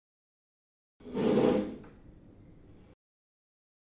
This sound shows the annoying noise that some people do when they're drag a chair.
It was recorded in a classroom of Tallers building in Campus Poblenou, UPF.